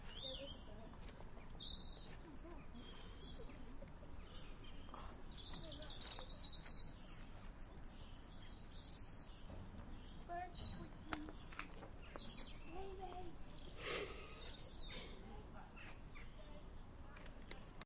SonicSnaps HD Jordan BirdSong
This is a sonic snap of some birdsog recorded by Jordan at Humphry Davy School Penzance